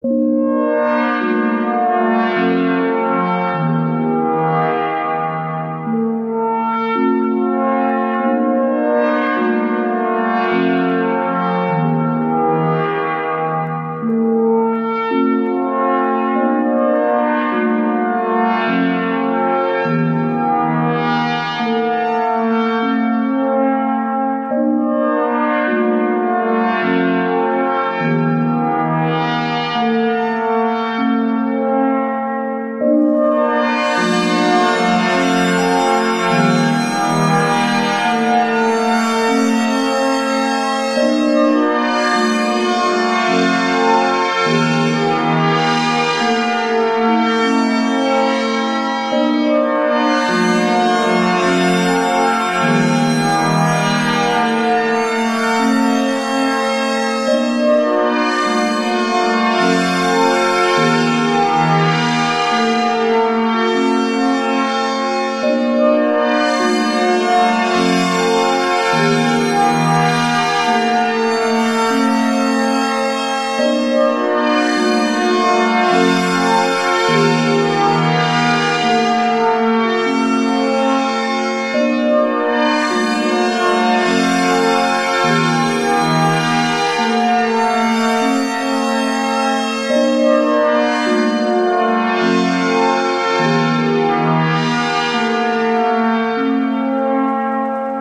ambient type melody
Some type of tamboura preset on dexed.
melody, ambient, dx7, sound, melodic